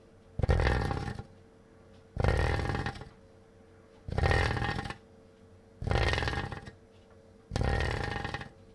rubbing a wooden spoon on a grate
domestic-sounds, grate, wooden